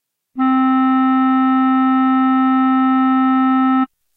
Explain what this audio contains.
overall quality of single note - clarinet - C4
instrument::clarinet
exercise::overall quality of single note
note::C4
microphone::iPhone5
tuning reference::440
clarinet, good-sounds, multisample, iPhone5